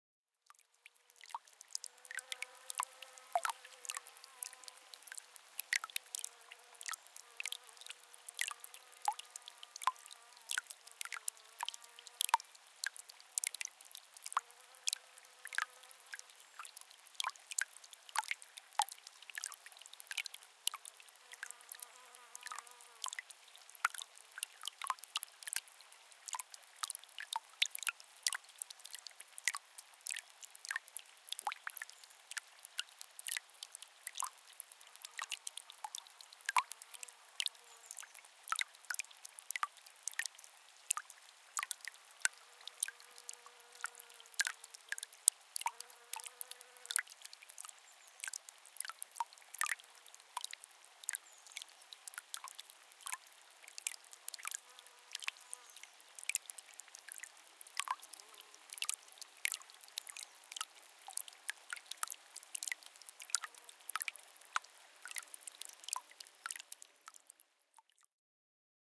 Slow Dropping Water 3
Single small fountain recorded with a Zoom H4
ambient, babbling, brook, creek, drop, field-recording, flow, flowing, fountain, gurgle, gurgling, liquid, nature, relaxation, relaxing, river, splash, splashing, stream, trickle, water, waves